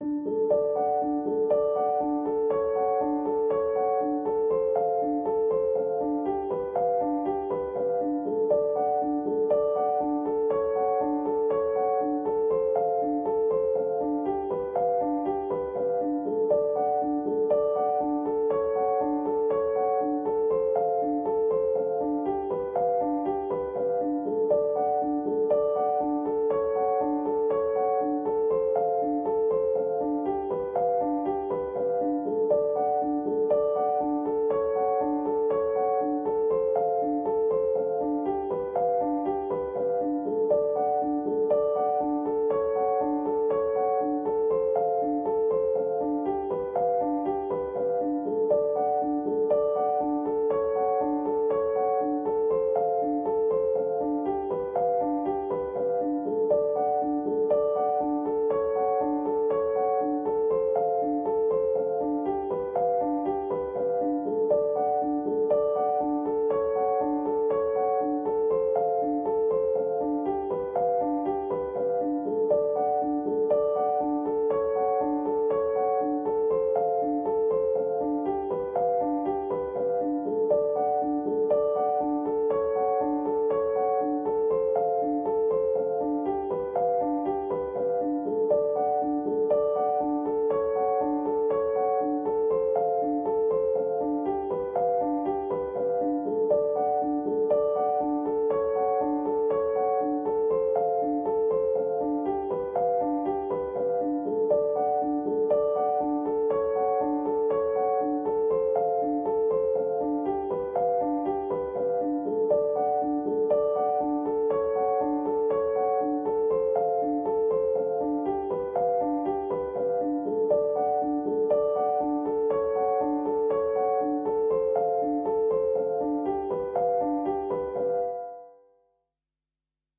Piano loops 035 octave up long loop 120 bpm
120, music, loop, simplesamples, 120bpm, samples, simple, bpm, Piano, reverb, free